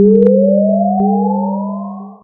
BLAIR Fiona 2014 2015 Lost Signal
HOW I DID IT?
Using 1 track created with Audacity :
First track (2 s)
generate a whistle sound (linear) starting at 440hz finishing at 1220Hz
apply effects : echo, fade out
pan : center
HOW CAN I DESCRIBE IT? (French)----------------------
Typologie :
V
Morphologie :
Masse: son complexe, seul
Timbre harmonique: brillant, descendant
Grain: lisse, aquatique
Allure: rapide, le son semble proche puis lointain
Dynamique : Attaque assez forte qui s'atténue par la suite
Profil mélodique: Variations serpentines et scalaires
Profil de masse : on distingue clairement la fréquence du son car il n y a qu'un son, elle baisse par accoups
Calibre : Pas de filtrage ou égalisation
lost, sonar, submarine